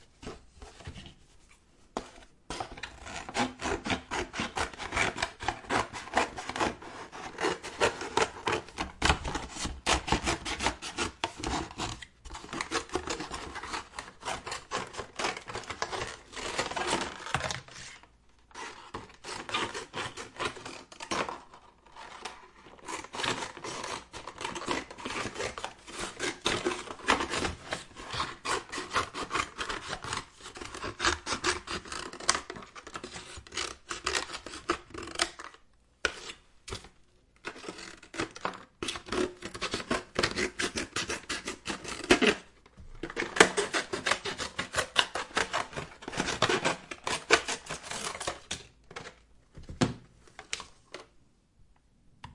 BIg scissors cutting a piece of cardboard.